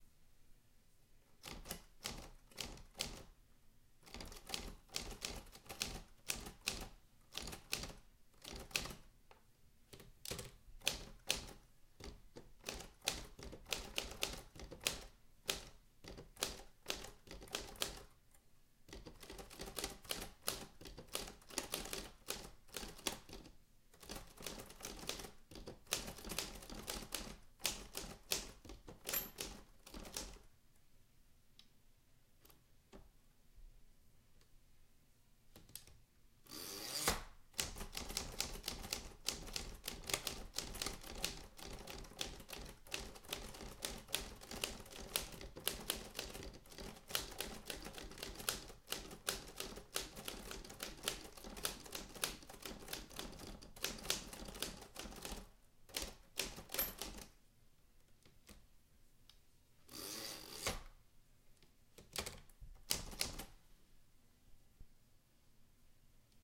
Typing on an old typewriter very close good quality sound
interior, keys, old, strike, typewriter, typing